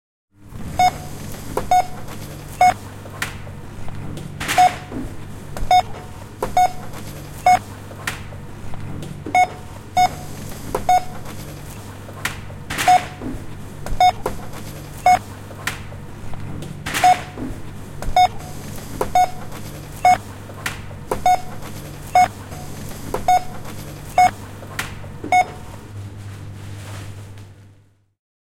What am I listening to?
Kassa kaupassa, viivakoodin lukijan piippauksia.
Paikka/Place: Suomi / Finland / Nummela
Aika/Date: 20.10.2003